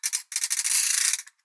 ratchet small02
Small ratchet samples. This is the most common size used in orchestras and elsewhere.